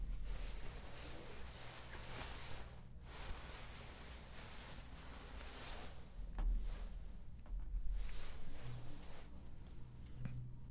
Rubbing on cloth